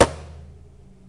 this is simply me kicking on my television in different versions (depending on strength and side of tv kicked). it sounds close to an snare kick... or something...
(ps: i wasnt angry with my tv, i was just recording sounds for a short film...)
kick, snare, tv